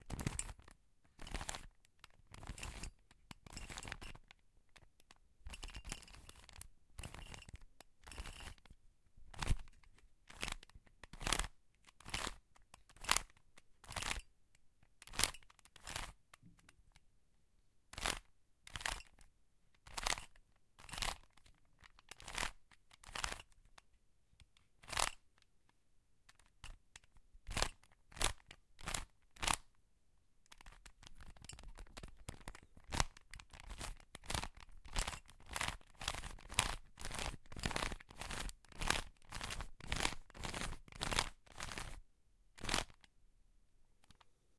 Rubik's Cube: section rotations pt.1
This is the recording of a Rubik's cube manipulation.
I recorded myself rotating the Rubik's cube sections in variuos directions and at variuos speed.
Typical plastic and metallic sounds.
manipulation, rotation, Rubik